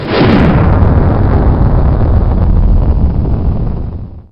I have reversed a bomb explosion (A firework in our backyard and edited a little...) and got this. Recorded With Realtek High Definition Audio Headset. Edited with Audacity.
bomb, fade, launch, rocket, spaceship, terror